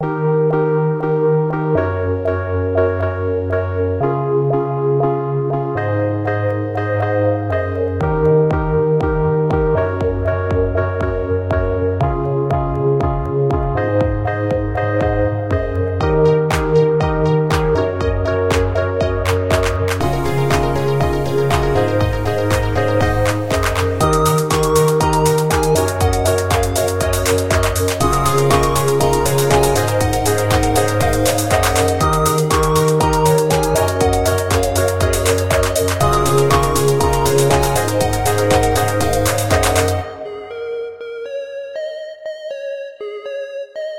robot-dance
Spacey-sounding robot dance loop created in LMMS and processed with Audacity. Enjoy. Created April 4, 2020.